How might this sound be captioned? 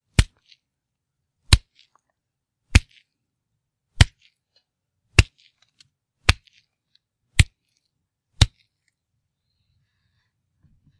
The sound of a knife being inserted quickly into someone.
fight sounds recorded for your convenience. they are not the cleanest of audio, but should be usable in a pinch. these are the first folly tests iv ever done, I hope to get better ones to you in the future. but you can use these for anything, even for profit.